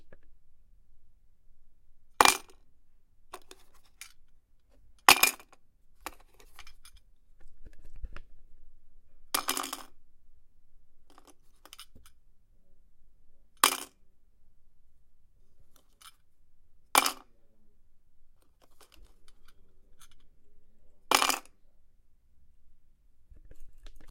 fall of a pin badge on metal surface, different versions.